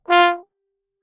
One-shot from Versilian Studios Chamber Orchestra 2: Community Edition sampling project.
Instrument family: Brass
Instrument: OldTrombone
Articulation: short
Note: F3
Midi note: 54
Room type: Band Rehearsal Space
Microphone: 2x SM-57 spaced pair
brass, f3, midi-note-54, multisample, oldtrombone, short, single-note, vsco-2